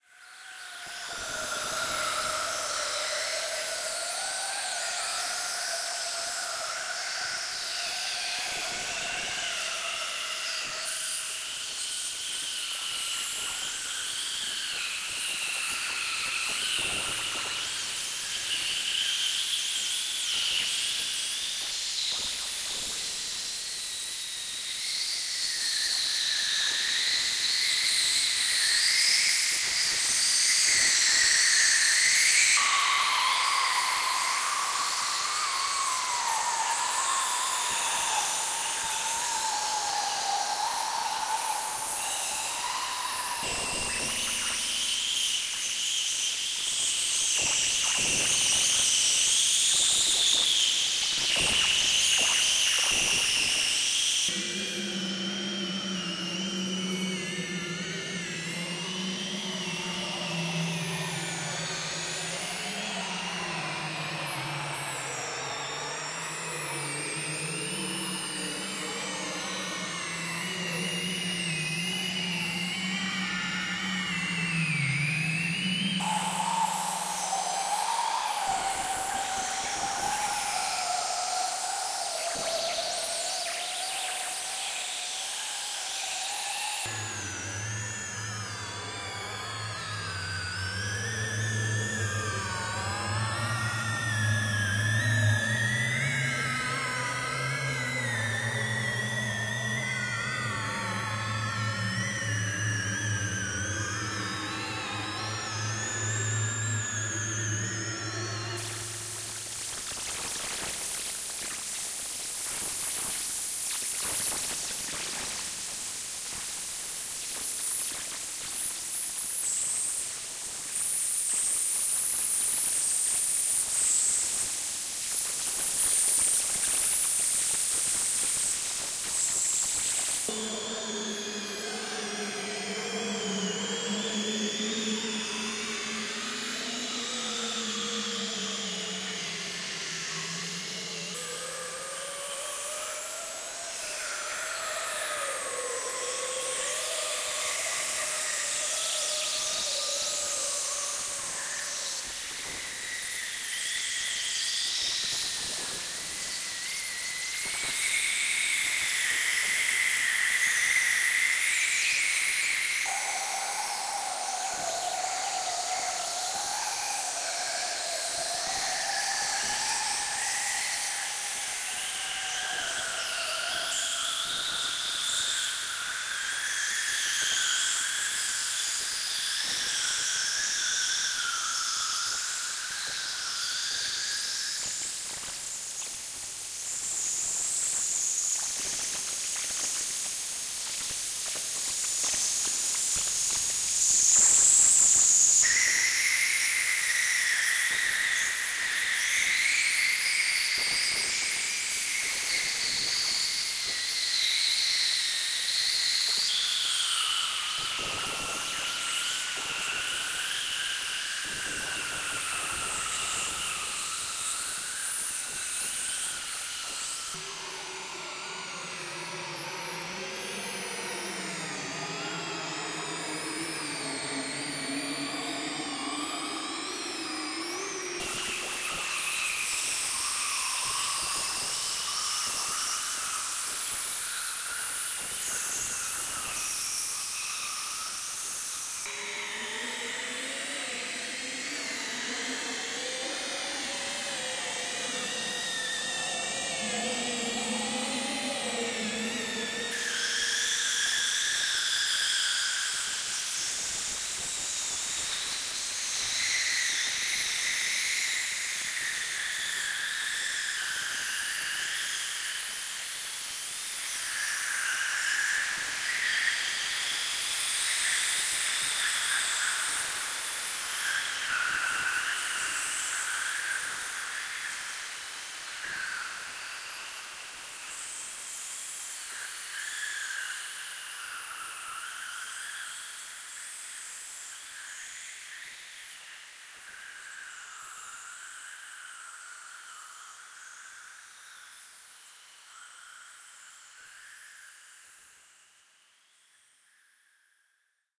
This sample is part of the "Space Drone 2" sample pack. 5 minutes of pure ambient space drone. Mainly higher frequencies.